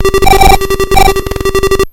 These are TR 505 one shots on a Bent 505, some are 1 bar Patterns and so forth! good for a Battery Kit.
505, a, beatz, bent, circuit, distorted, drums, glitch, hammertone, higher, hits, oneshot, than